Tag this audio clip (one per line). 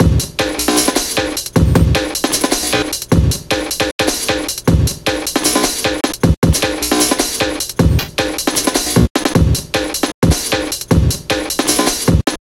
bass
drum
drumbeat
breakbeat
drumloop
jungle
drumloops
drums
beats
snare
breakbeats
beat
drum-loop